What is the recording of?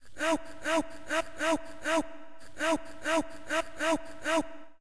100 Distorto Vox Rythm 01
Rythm, Vox